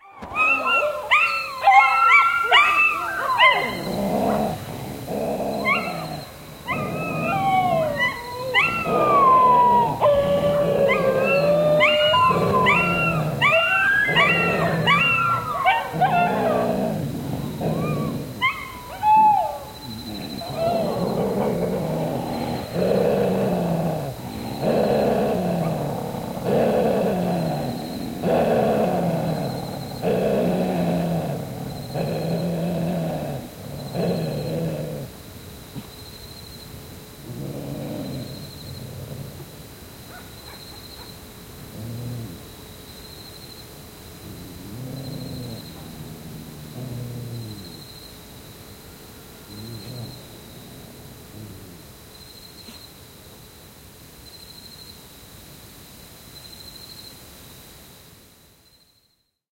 Tansania, koirat, koiralauma, yö / Tanzania, dogs in the night, pack of dogs barking, growling and whining, crickets in the bg
Lauma vihaisia koiria Sansibarin yössä. Haukuntaa, murinaa ja ulvontaa, joka vähitellen vaimenee. Taustalla sirkkoja.
Paikka/Place: Sansibar
Aika/Date: 01.10.1989
Africa, Afrikka, Domestic-Animals, Field-Rrecording, Finnish-Broadcasting-Company, Pets, Soundfx, Tehosteet, Yle, Yleisradio